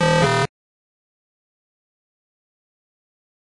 Invalid Selection
videogame, glitch, incorrect, bit, error, game, problem, menu